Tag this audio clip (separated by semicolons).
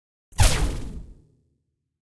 Synth; Laser; Shoot